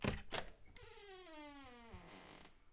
Door Open 01
Office door. Recorded with Zoom H4n.
close, field-recording, Door, open, handle